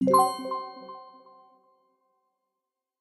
Synth glockenspiel ui interface click button positive 2
click, button, glockenspiel, positive, ui, interface